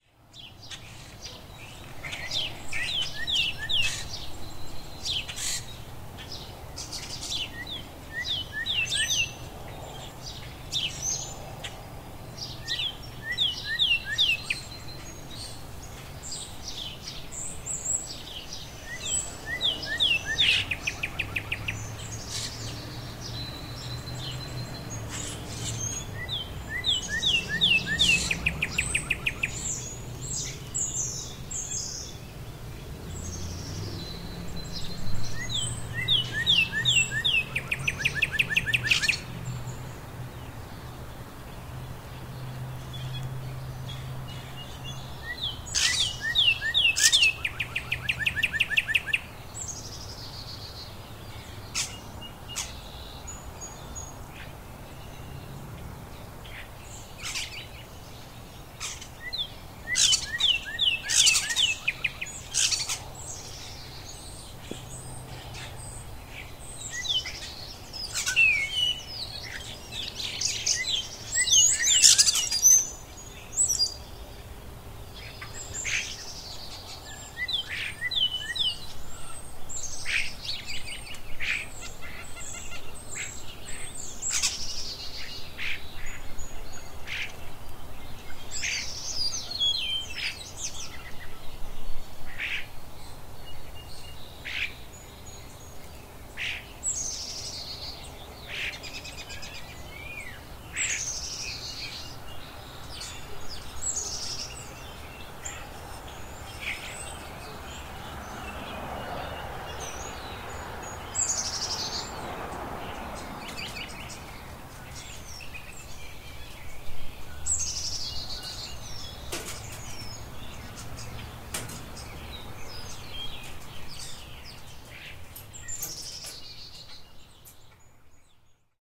The sound of a bright March morning featuring Cardinals, Robins announcing their territory, and wrens. The temperature was right at 50 degrees with no wind. I made this recording with my Marantz PMD661 using two Samson CO-2 microphones., pointed 180 degrees apart to gather as much sound as I could.
The sound was sometimes a cacophony as it seemed all of the neighborhood birds were so happy that Spring is finally here, many times they were all singing at once.!